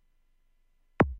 Psy Kick
Typical laser kick, which I created from my Doepfer Dark Energy.
psy, psytrance, kick